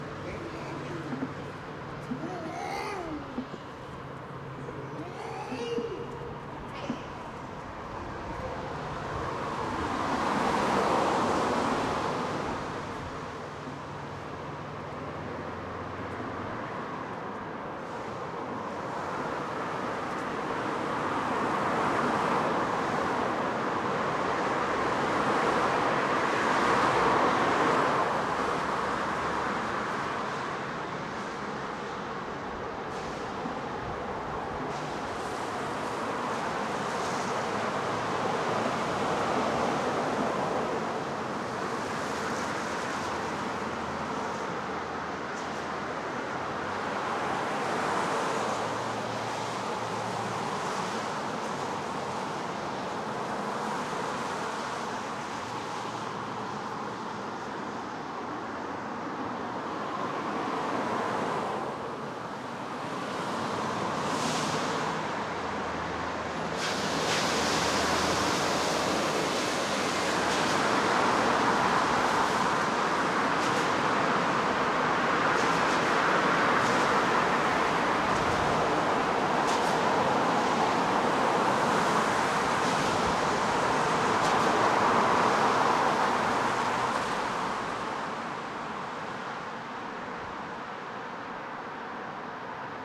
Recorded traffic under a bridge with water dripping around me and a homeless man making noise. Recorded to Tascam HDP2